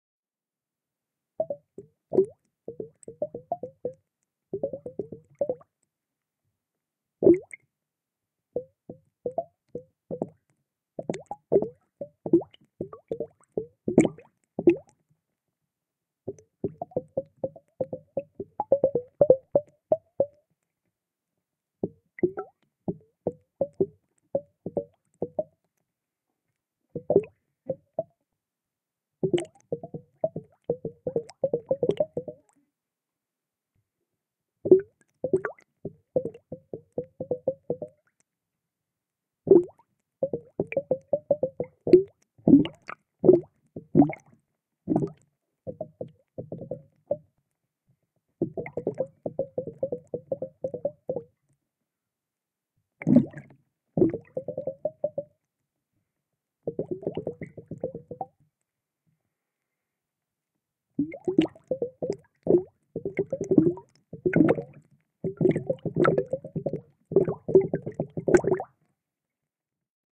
A hydrophone recording of air bubbles being blown through a Plastic tube underwater.Title denotes diameter of tube. DIY Panasonic WM-61A hydrophones > FEL battery pre-amp > Zoom H2 line-in.